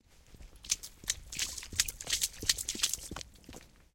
running through a puddle
running through a water puddle on the street. the water splashes around and then the person gets back on the wet street
liquid, water, puddle, drip